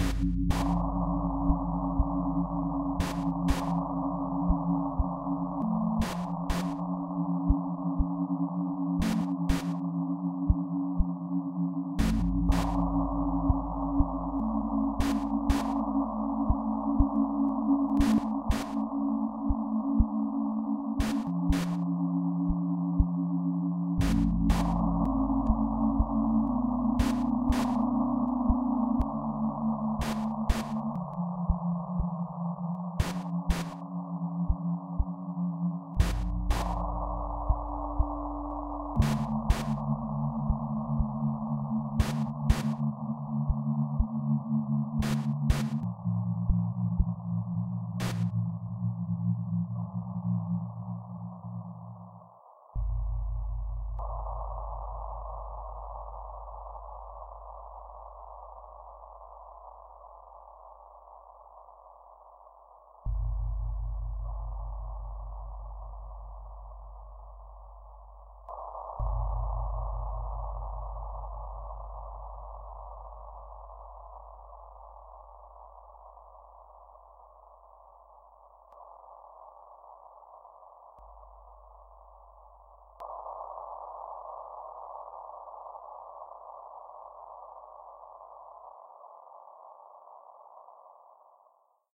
Decaying Planet
A slow chillout, uses some risset drums and effects.Made using 80 bpm with a accentuated swing to the left.
Made from the thought of journeys, the stars, the galaxies we are amongst and our insignificance in the scheme of everything. We are nothing. Mind blown, back to gravity.
chillout, synth